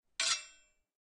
anvil draw 1
The sound of what I imagine a sword/steel bar drawn across an anvil would probably make. This was created by hitting two knives together and resampling it for a lower pitch.
metal, processed